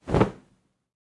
clothes drop 5
material, drop, Clothes